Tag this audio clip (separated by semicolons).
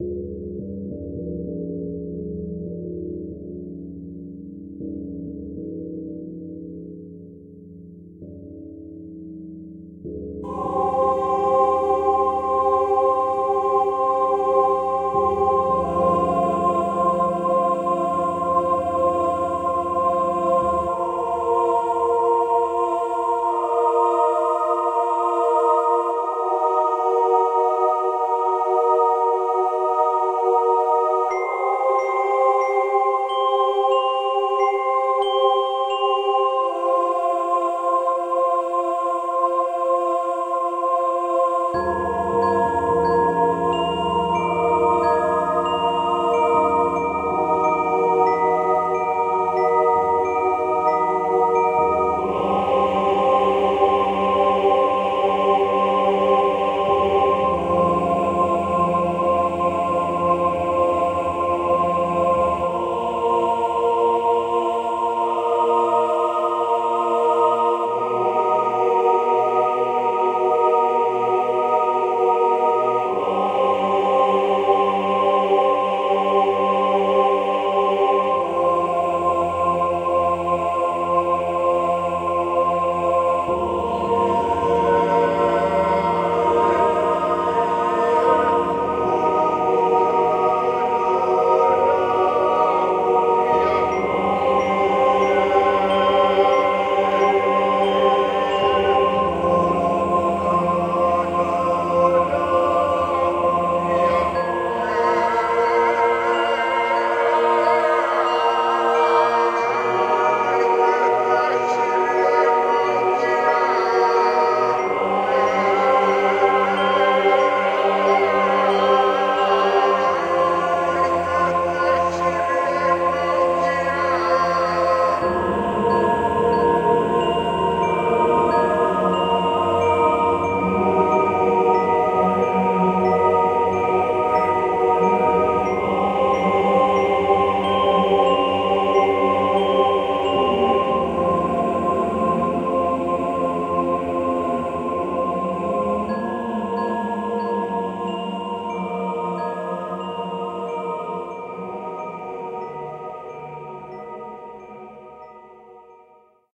ambient; uneasy; choir; evil-choir; haunted; distant; strange; Halloween; eerie; choral; horror; dreamlike; spooky